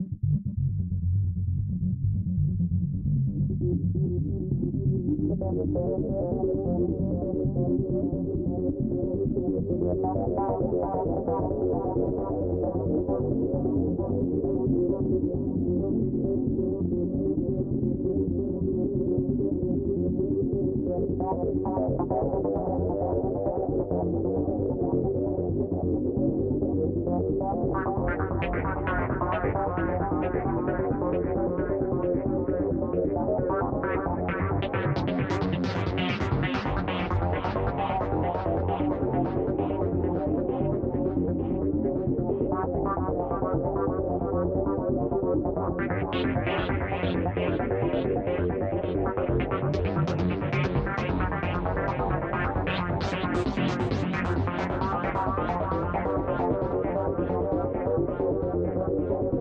acid ambient
PLayed with several filters on the phone synth in abelton, this is the result
ableton, acid, ambient, digital, effect, electronic, future, fx, phone, soundeffect, soundesign, space, synth, synthesizer